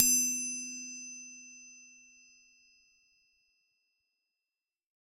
Triangle Open 03
Basic triangle sample using wooden mallet.
Recorded using a Rode NT5 and a Zoom H5.
Edited in ocenaudio.
It's always nice to hear what projects you use these sounds for.